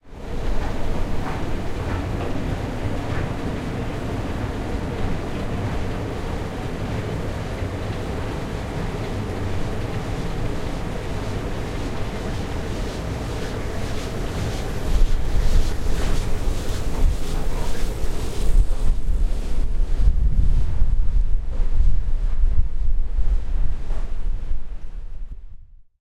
Big Fan at Hilversum Trainstation
Big fan near Hilversum train station.
Recorded with the Zoom H4 Handy recorder (XY Stereo).
noisy, windy, circulate, fan, sucking, suck, circulation, vent, wind, blowing, flutter, blow, fluttering, noise